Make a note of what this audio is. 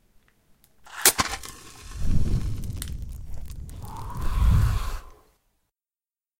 A torch is lit with a matchbox and then blown out by a sudden wind.